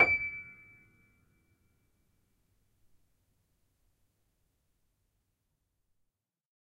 upright choiseul piano multisample recorded using zoom H4n
choiseul, multisample, piano, upright